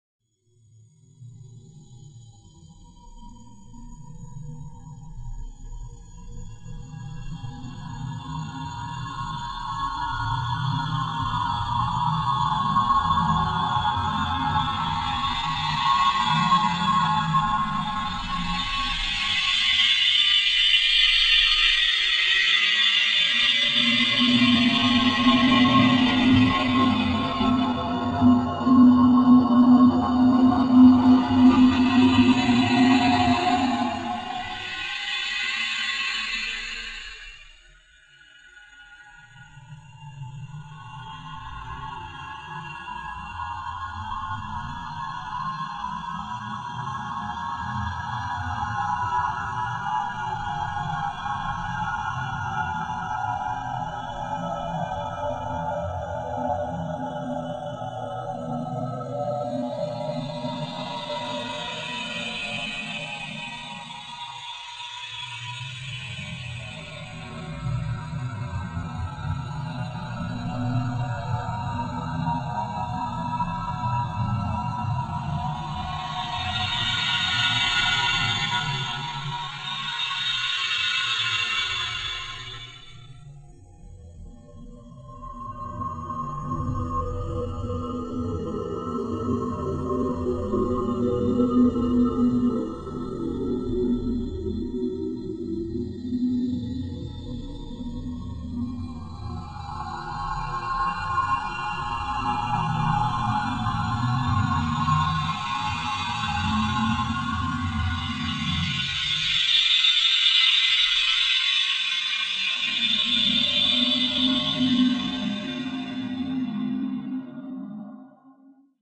Alien Sound 5
I created these using just my voice recorded with my laptop mic and wavpad sound editor. I needed some alien type sounds for a recent project so I created these. Enjoy!